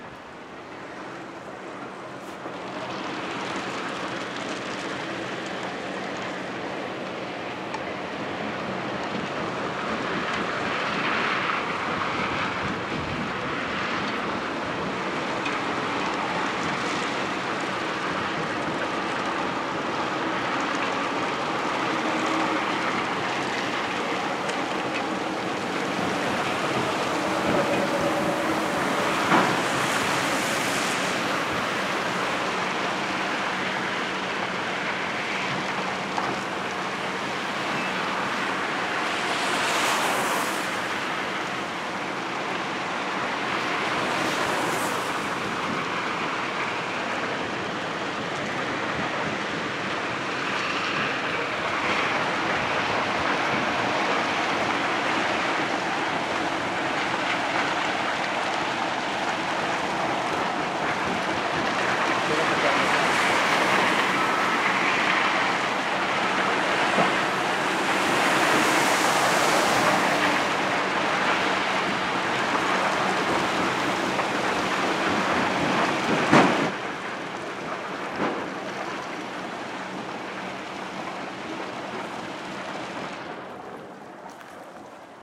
Ambiente - maquinaria cantera
machines on a quarry
MONO reccorded with Sennheiser 416
machine, quarry, quarry-machine, stone